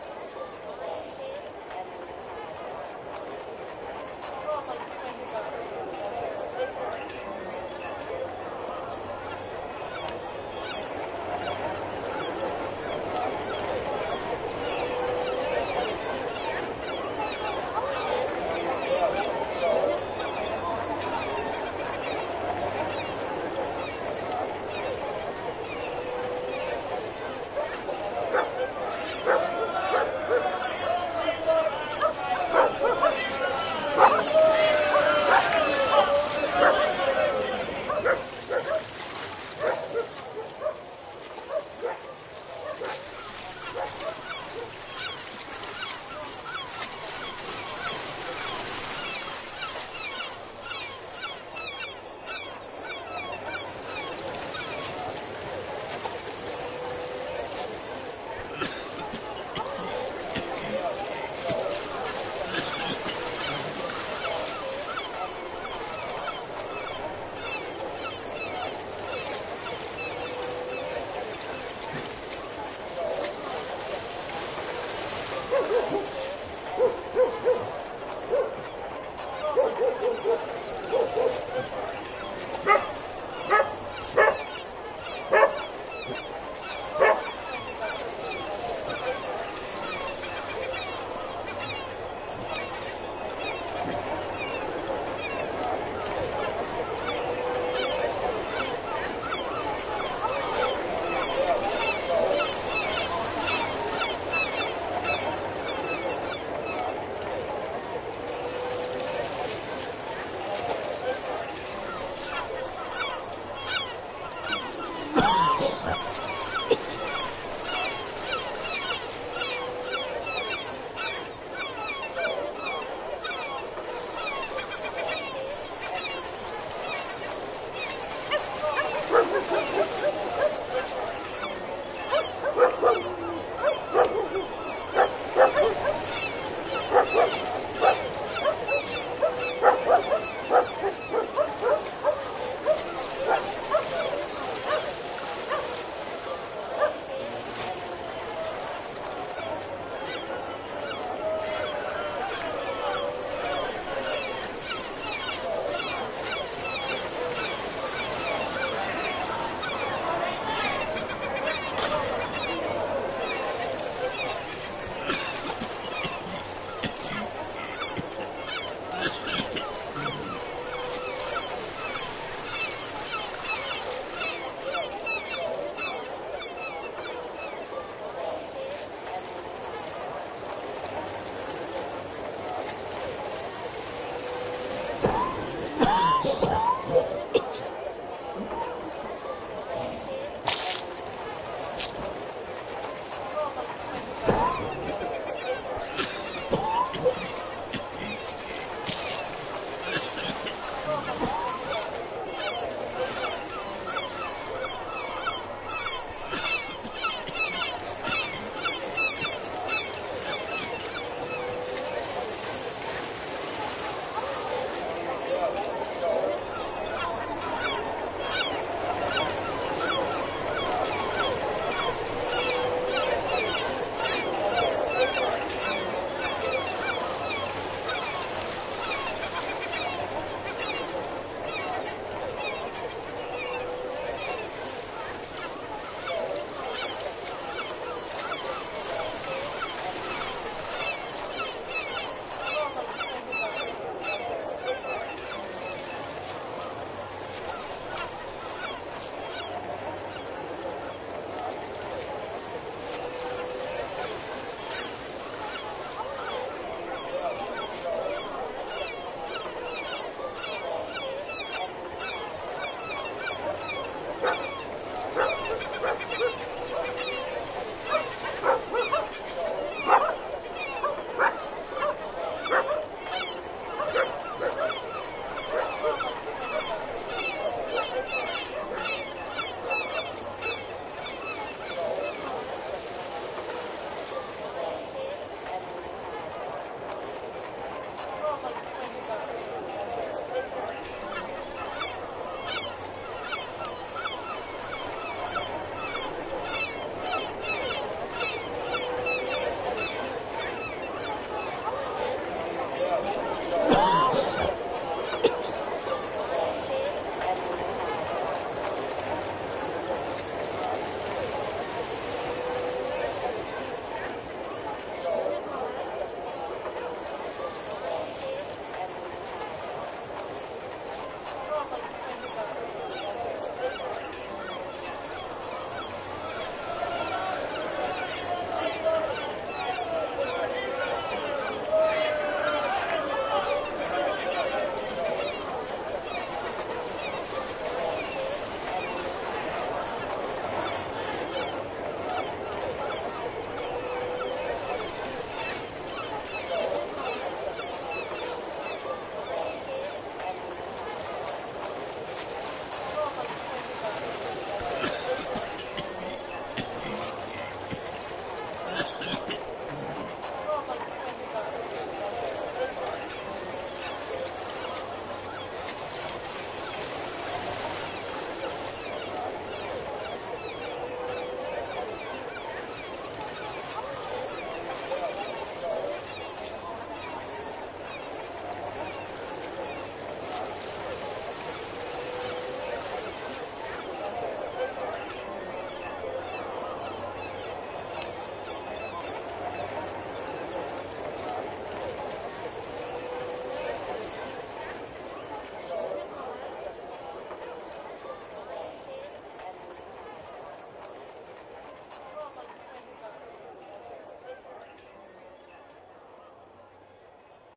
Der Alte Hafen Löwensteins.